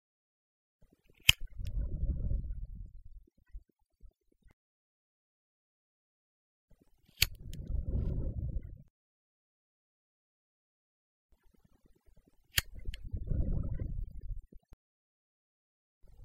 Using a lighter
Clicking on a lighter with a small flame whoosh.
cigar, cigarette, click, flame, lighter